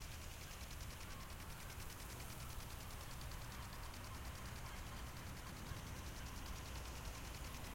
porto morning lawn sprinklers 08
Porto, Portugal, 19th July 2009, 6:30: Fast paced lawn Sprinkler between the Douro river and a road. Water hitting the concrete sidewalk and car traffic passing by.
Recorded with a Zoom H4 and a Rode NT4
morning, seagulls, smc2009, sprinkler